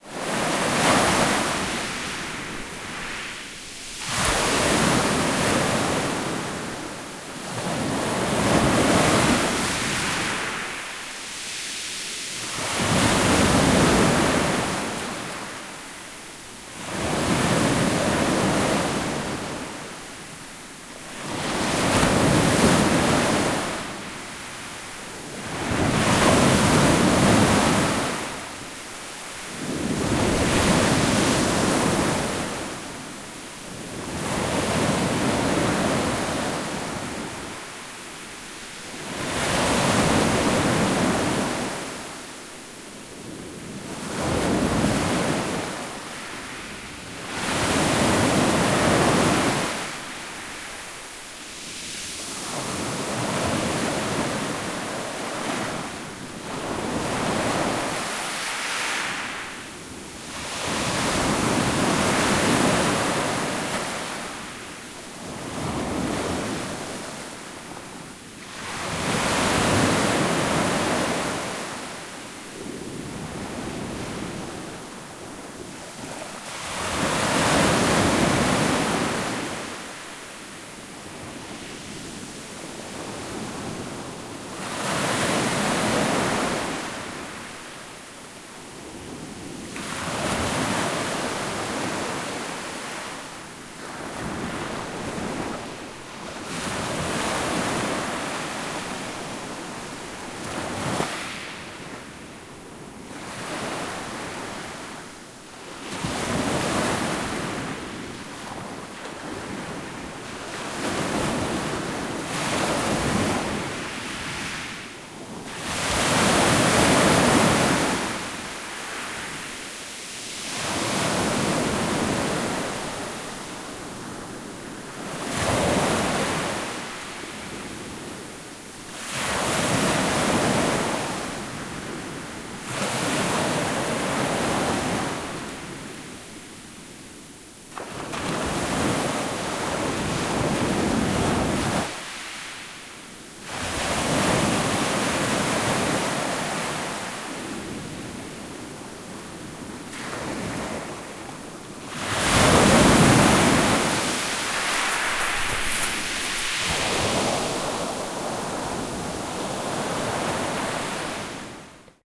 Sea Waves 04
sea, field-recording, waves, beach